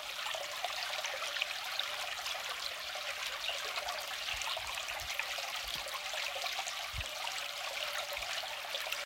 Small stream recorded near a lake in my local forest.
Hope you find it useful. If you like the sounds check my music on streaming services too (search for Tomasz Kucza).